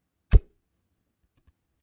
Bow Release (Bow and Arrow) 4
Sound of the releasing of a bow when firing an arrow. Originally recorded these for a University project, but thought they could be of some use to someone.